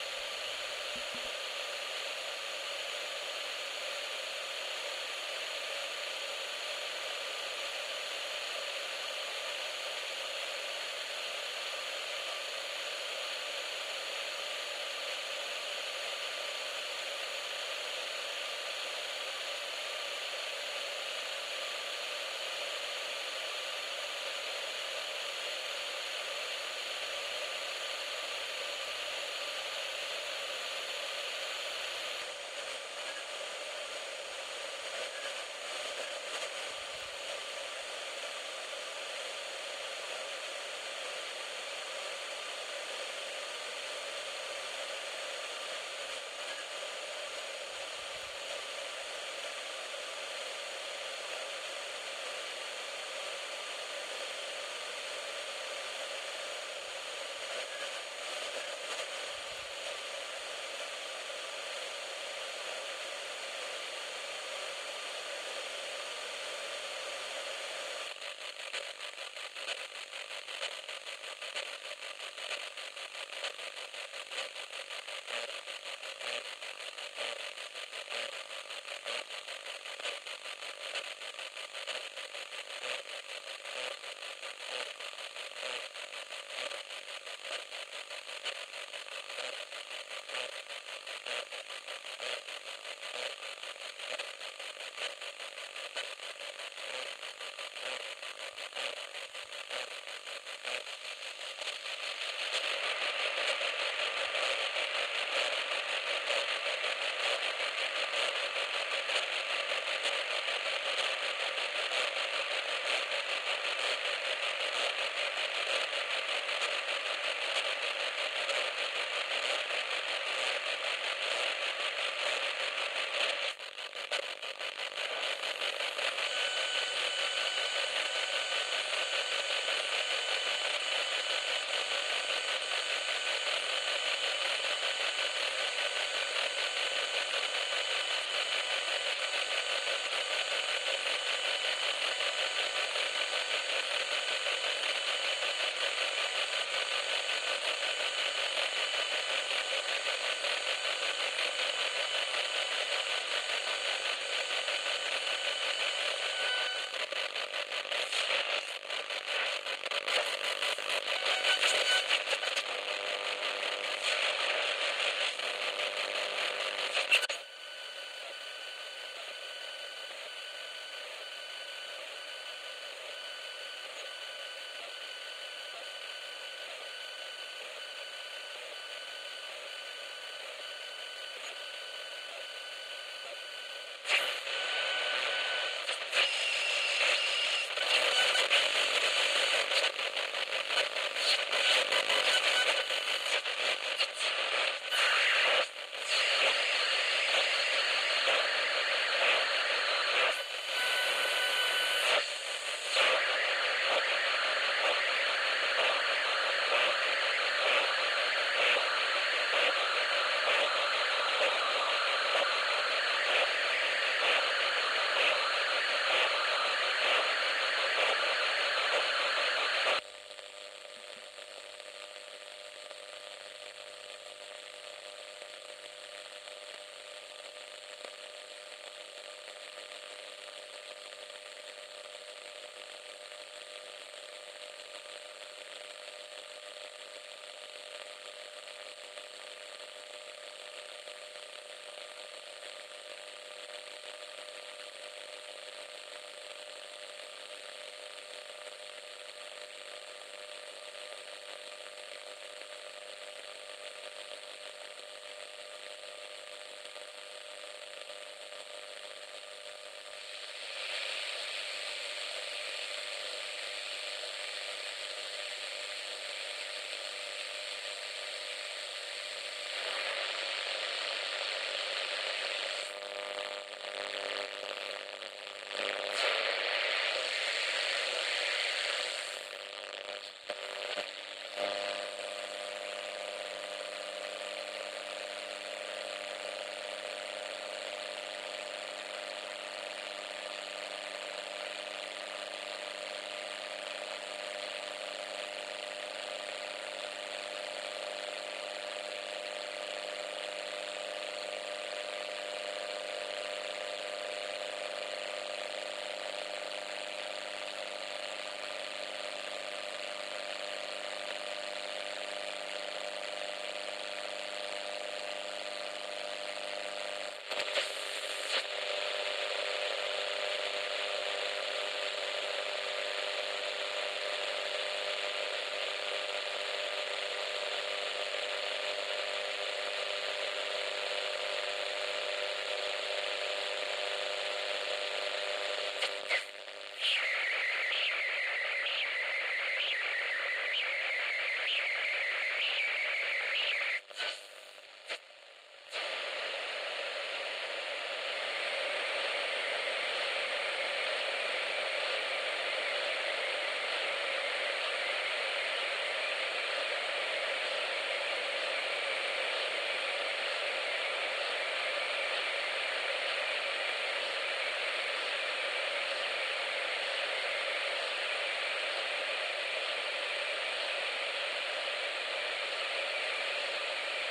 Various Radio Noises [d16]
Various recordings of a real noise of a small radio. FM-noise is at the beginning of the file and AM starts at 1:08 till the end. Recorded with Zoom H4n Pro.
AM, FM, noise, radio, real, static